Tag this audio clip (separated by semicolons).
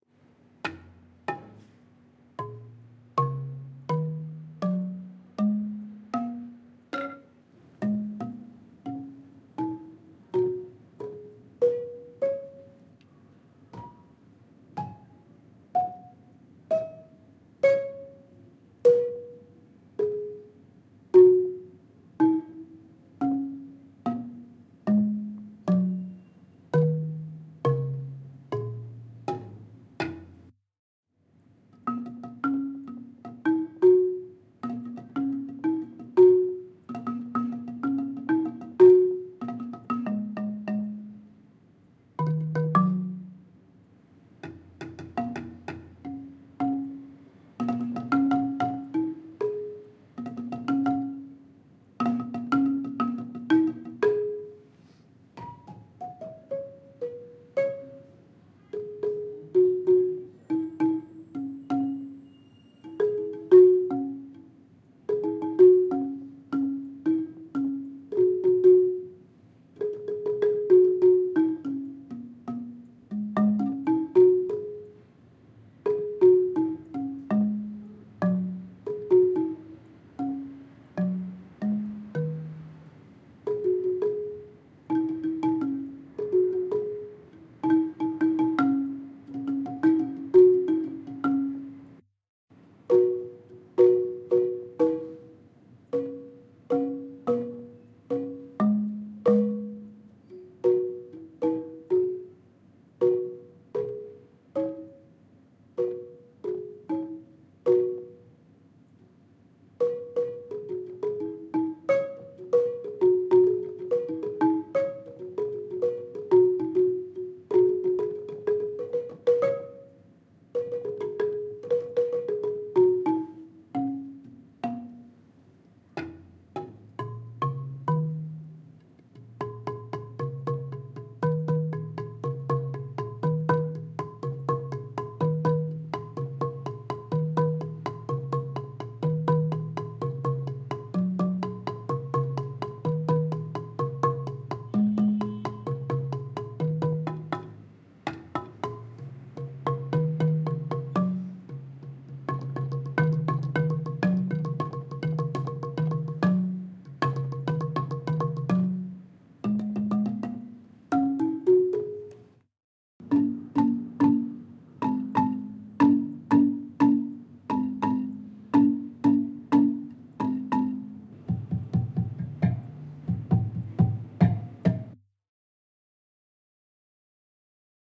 asian; drum; h4; japan; percussion; ranad; sample; tokyo; xylophone; zoom